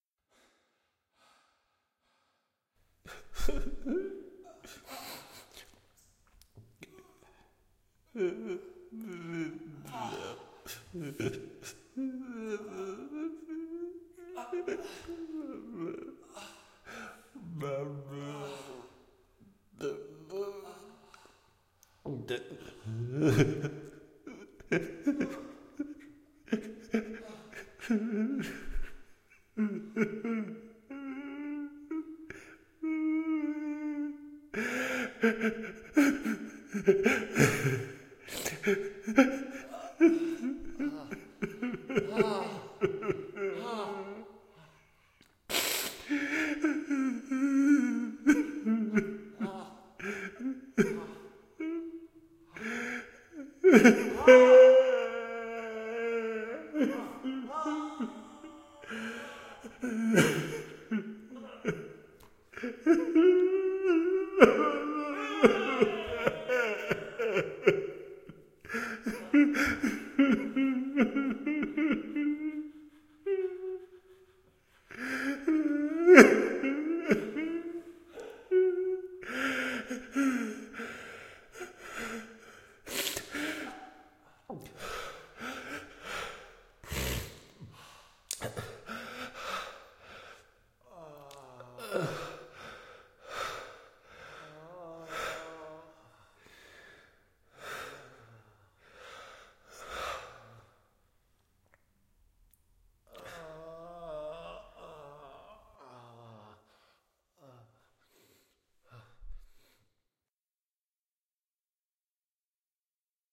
Needed different sounds of men crying in pain. So recorded a set of different noises, grunts and crys. Made some fast mixes - but you can take all the originals and do your own creative combination. But for the stressed and lazy ones - you can use the fast mixes :-) I just cleaned them up. Si hopefully you find the right little drama of pain for your project here.